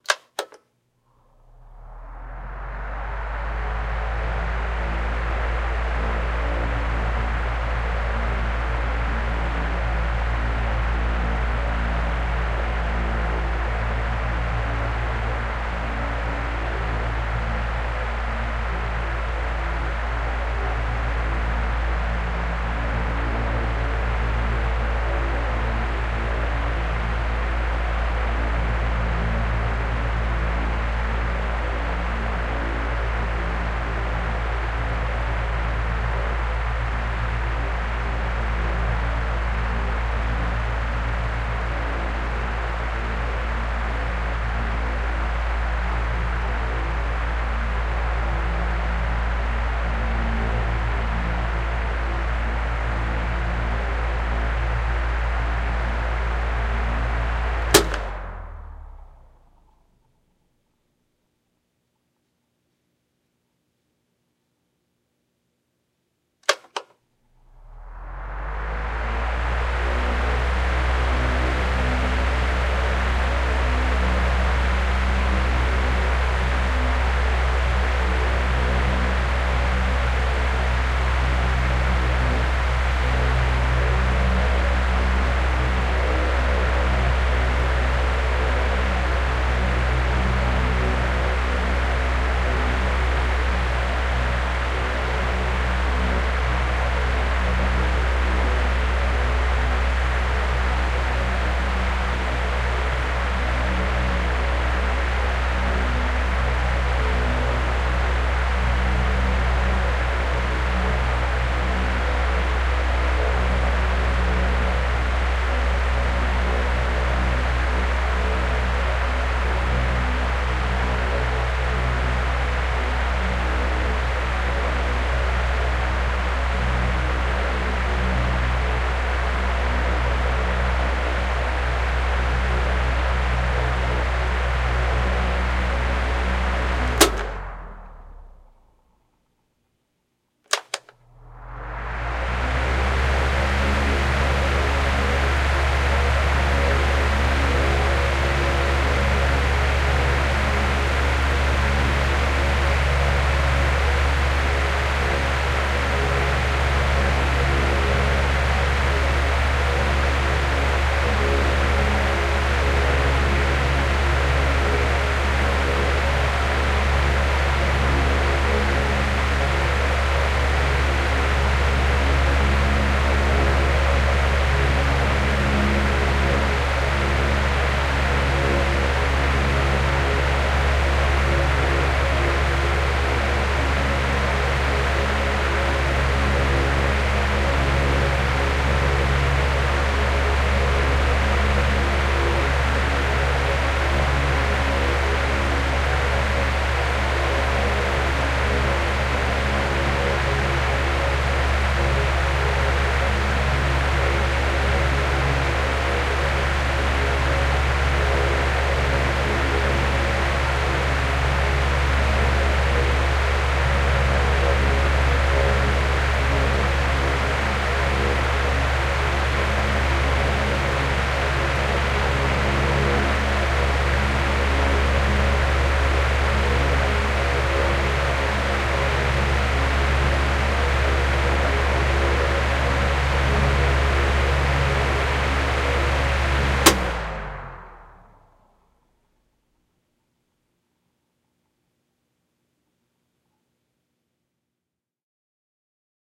This is a table fan recorded at 3 speeds. 2 AT 2020s equally spaced for decent stereo width.